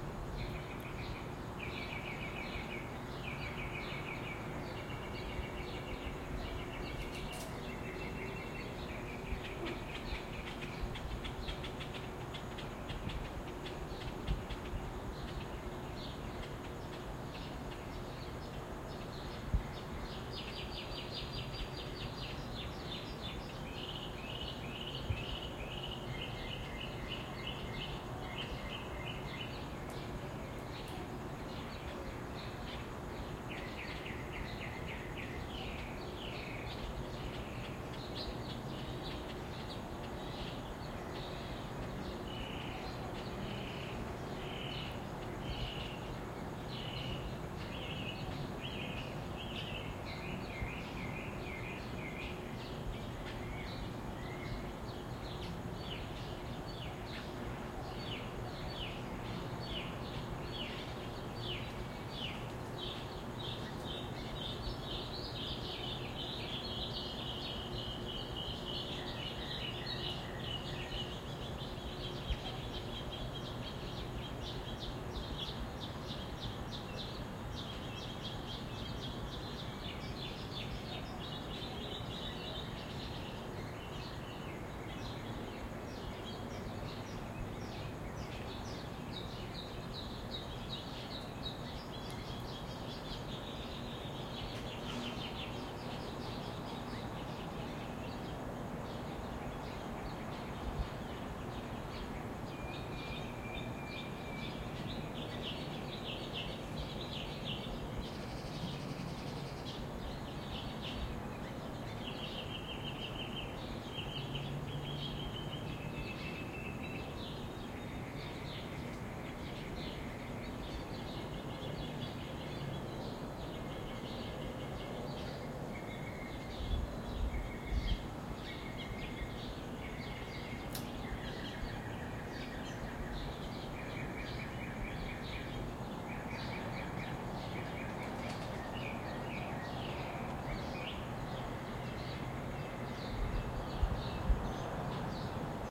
Recorded at dawn in the summer in front of an apartment complex on a residential side street in central Phoenix Arizona. Variety of birds, faint city ambience, perhaps distant air conditioners. Some traffic on a larger road about 100m away.
Recorder: Zoom H1
Processing: none